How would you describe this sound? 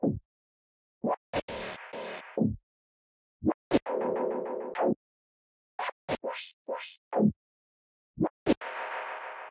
This is a synthesized bass loop I made using Ableton Live.